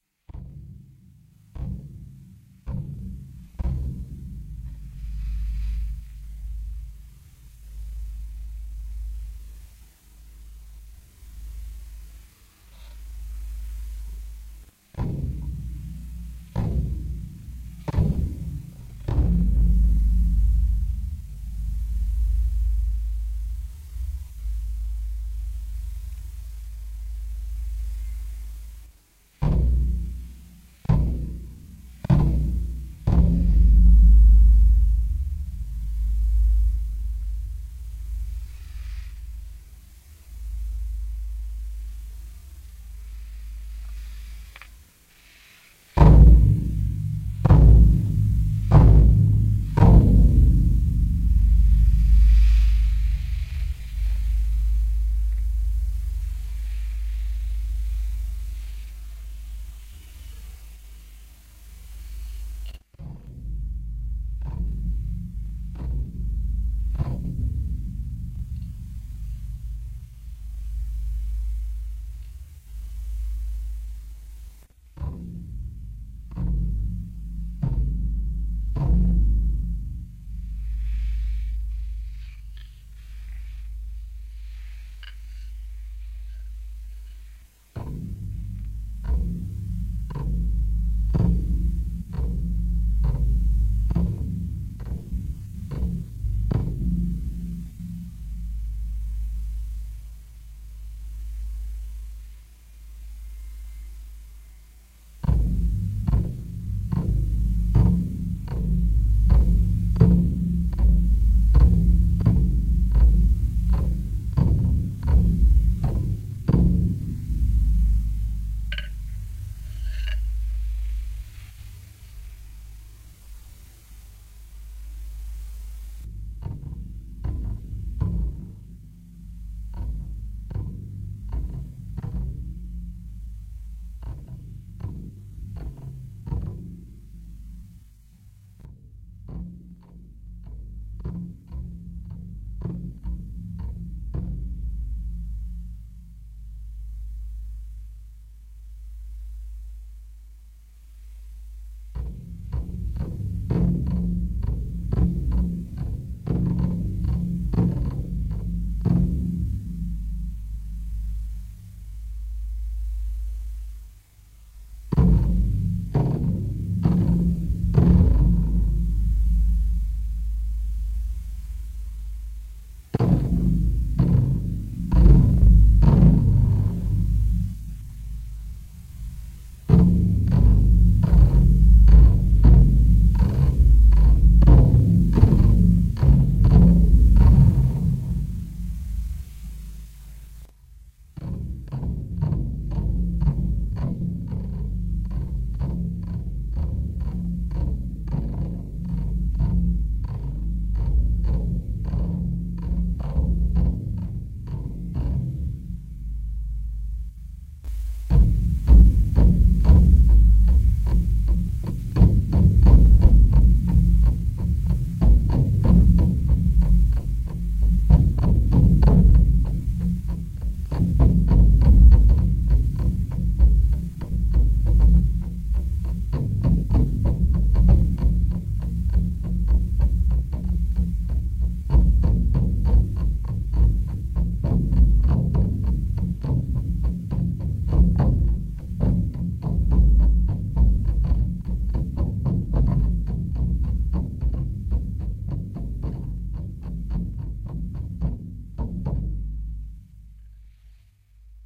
Plastic tube bass drum with own designed drum skin. Gives a deep and longlasting vibration.
unique, drum, extreme